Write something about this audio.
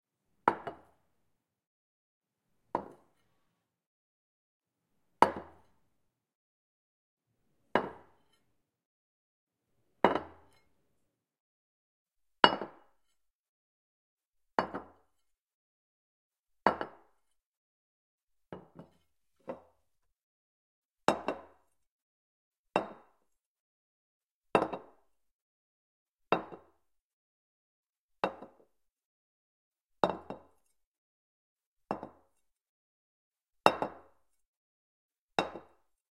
Two different ceramic plates being set on a wooden table. Recorded with H4N Pro.